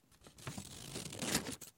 Scissors cutting paper